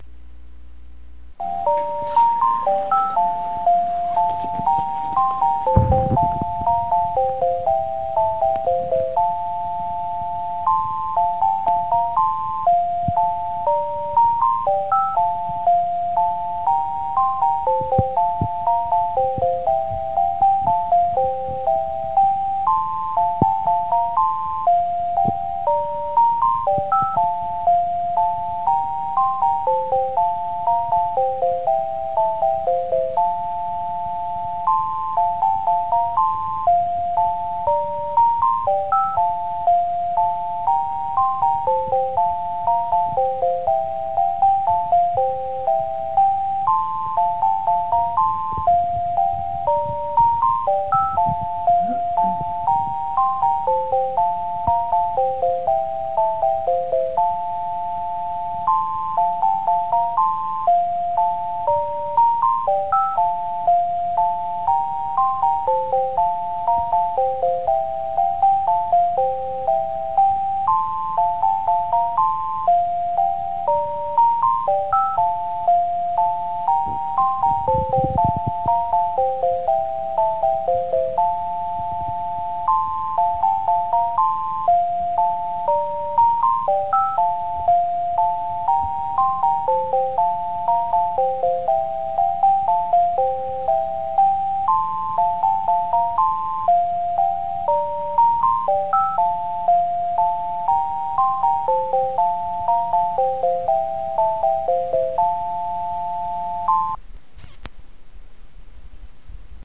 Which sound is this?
Música de conmutador en espera. Music on hold switch.